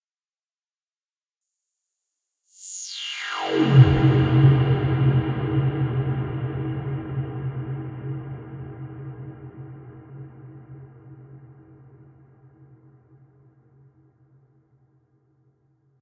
sound fx v3 by kris klavenes 07.01.18
i did this my self on ableton live sound of synths on ableton live
effect, fx